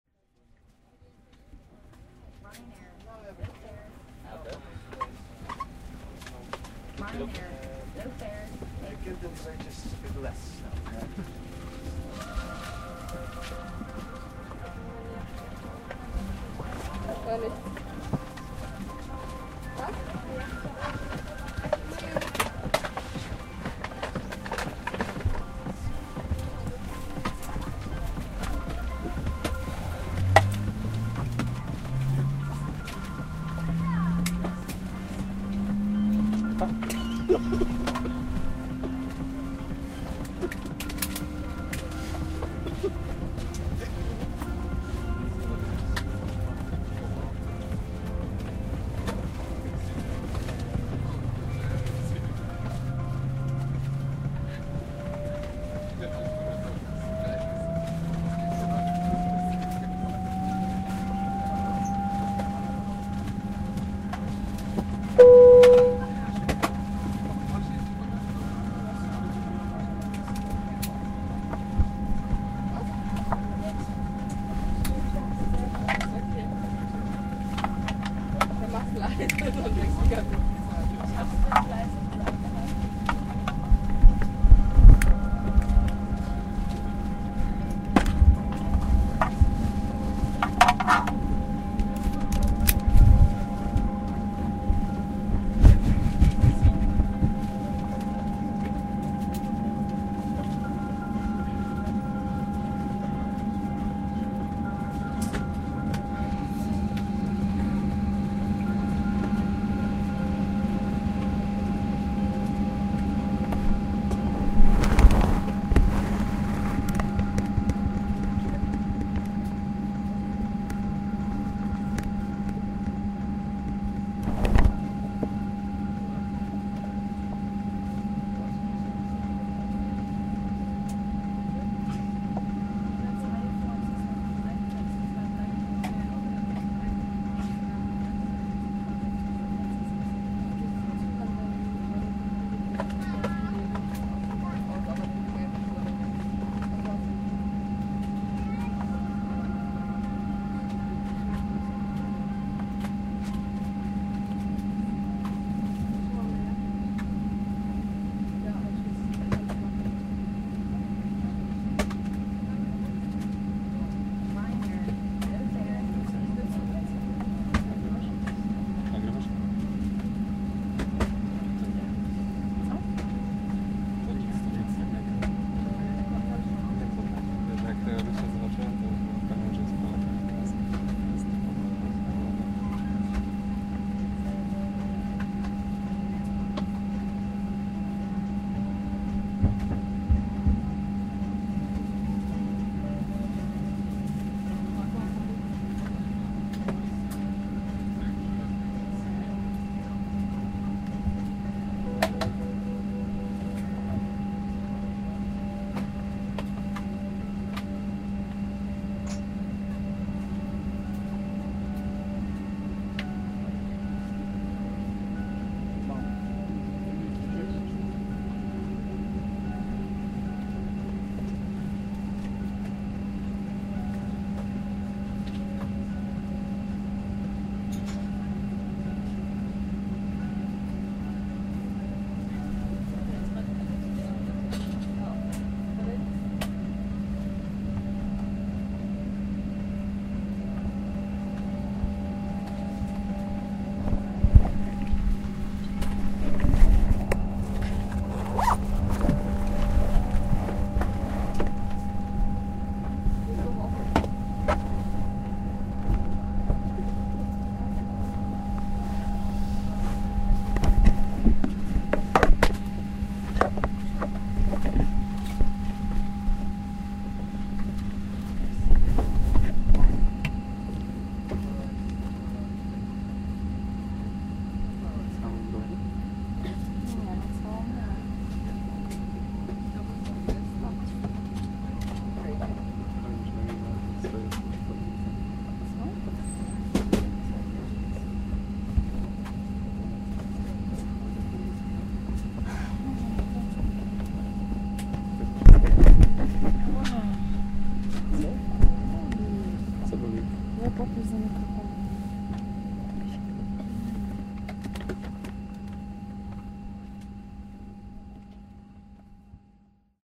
Glasgow on the plane 060716
06.07.2016: around 2:00 p.m. Airport in Glasgow - on the plane. General atmo of boarding. Recorder - zoom h1, no processing.
airplane, noise, plane, take-off